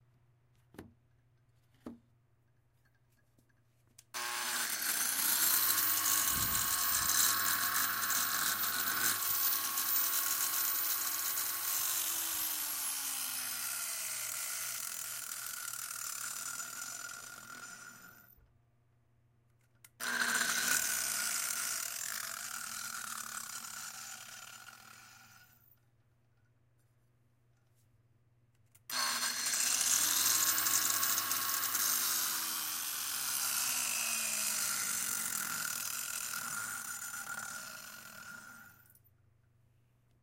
Recorded with a Tascam DR-05. Just an angle grinder spinning.
Field-Recording, Noisy, Power-Tools